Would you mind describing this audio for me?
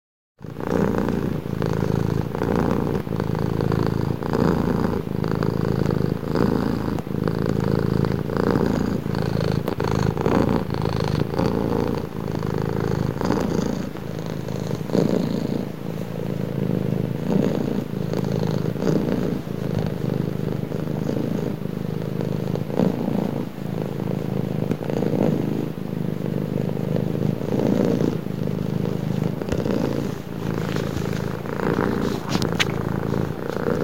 The Black Cat are purring
home, purring, cat, a